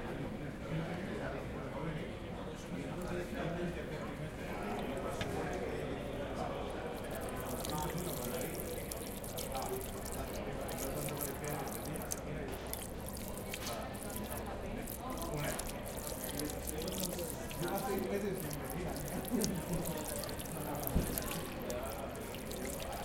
This is a foreground sound. This is the sound of a water fountain. The sound of water is clear, but is in a cafe atmosphere with voices and background noise. The sound has been recorded with a Zoom H4n recorder.